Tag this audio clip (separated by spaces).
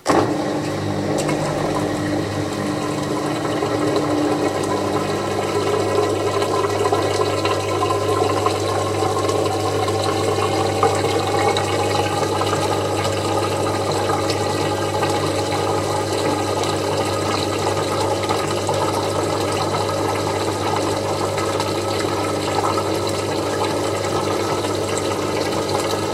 bath bathroom domestic drain drip dripping drying faucet Home kitchen Machine mechanical Room running sink spin spinning tap wash Washing water